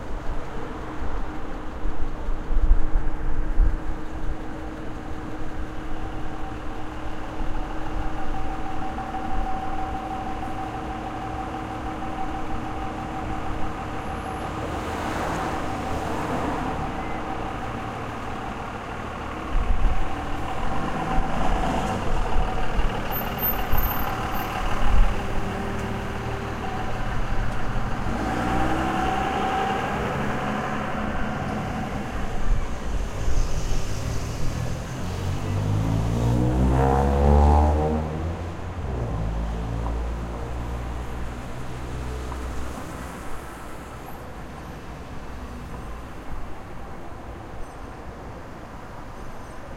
Diesel truck and small car
A diesel engine in the street pulling up, and taking off followed by a small car with a loud muffler. Recorded on a Tascam DR-07 in Chicago.
Car, Diesel-engine, Muffler, Public, Road, Street, Traffic, truck